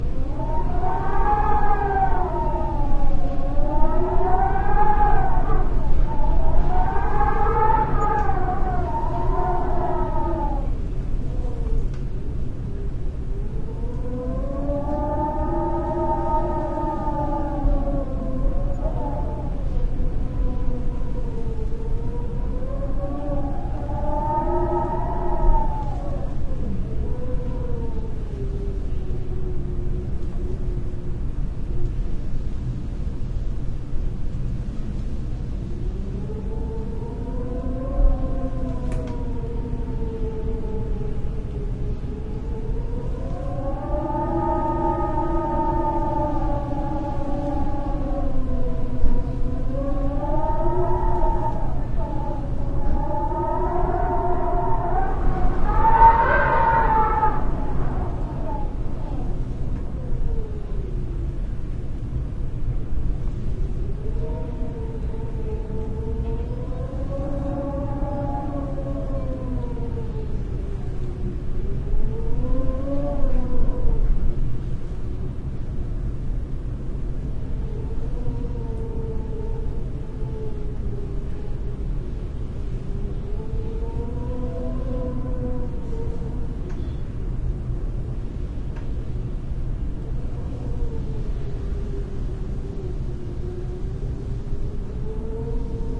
stairwell wind
Recording of the wind howling through a doorway into a wooden stairwell one night. Stereo, uncompressed audio ready for you to process!